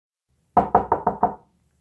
door knock
door, recorded